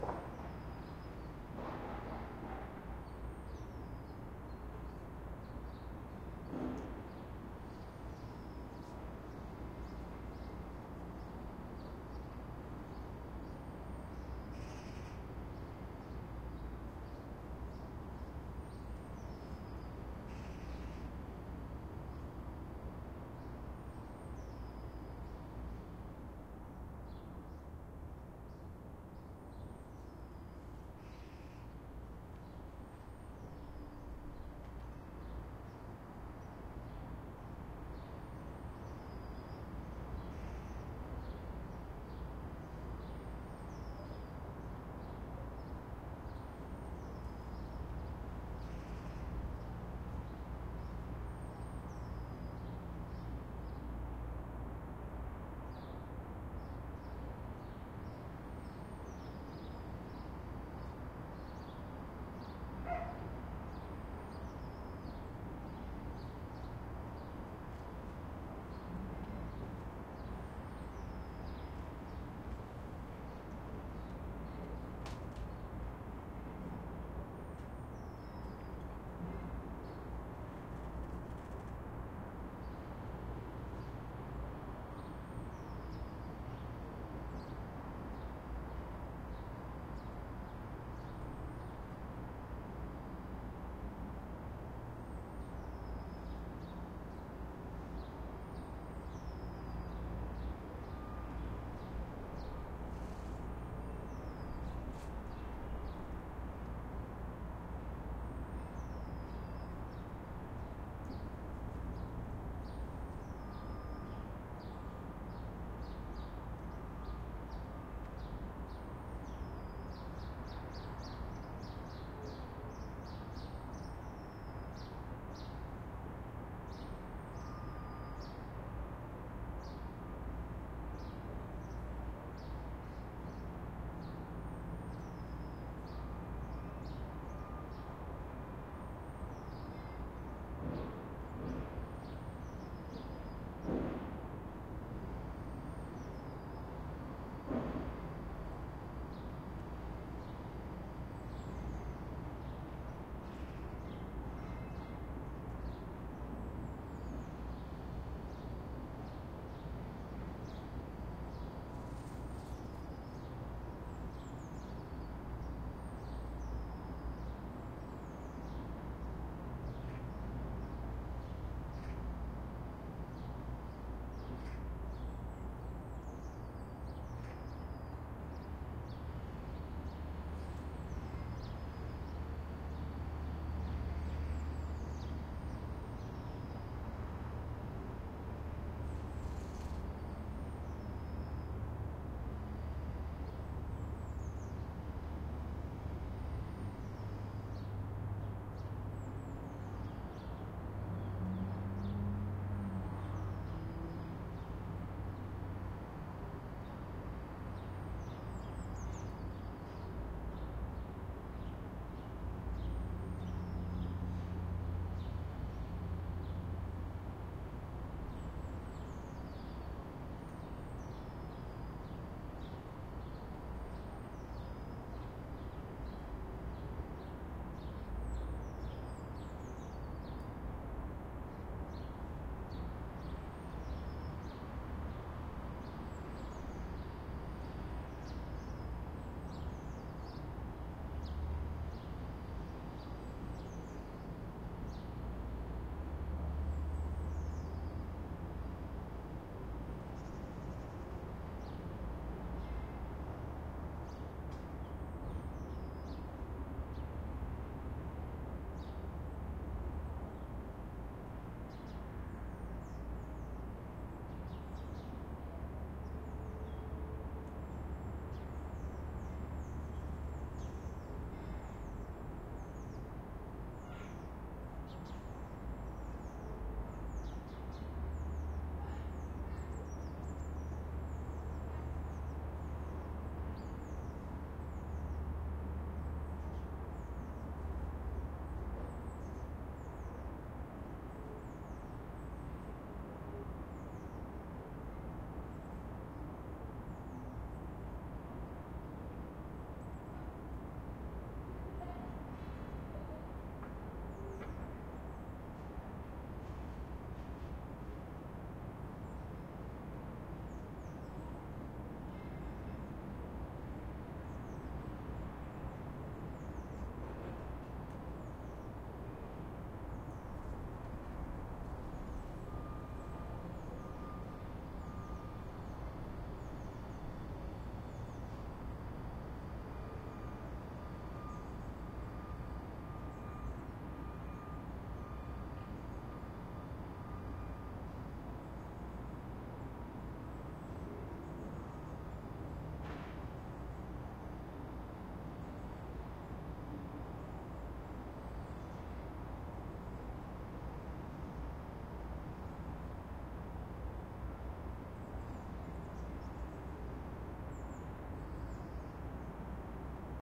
urban environment with distant construction
Winter morning urban recording from the backyard.
Recorded using 2 NTG3 shotgun microphones into an FR2-LE field recorder.